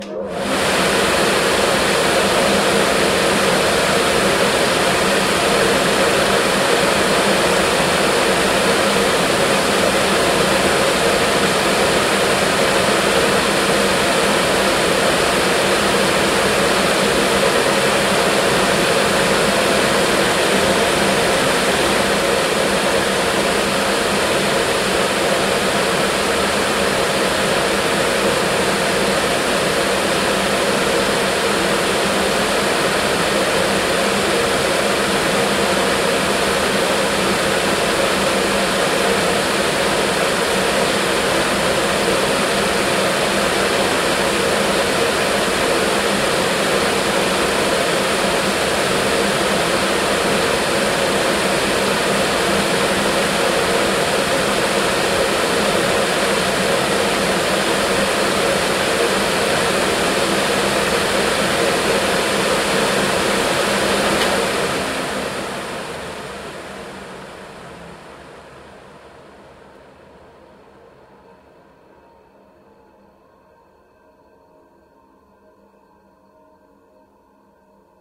Fume extractor - Suomen puhallintehdas oy - On run off at the nozzle
Fume extractor turned on, running in it's own pace and turned off as heard close to the suction nozzle.
26bar, 80bpm, concrete-music, fume, metalwork, suction, vacuum